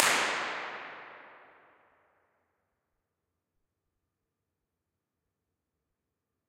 Spinnerij Grote ruimte ORTF wall centre-NORM 02-03
Old electric generator room out of use, recorded with a starterpistol recorded with Neumann KM84s in ORTF setup. Centre position.